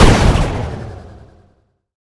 A synthesized laser shot sound to be used in sci-fi games. Useful for all kind of futuristic high tech weapons.
gaming, indiegamedev, high-tech, sci-fi